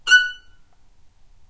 violin spiccato F#5
spiccato, violin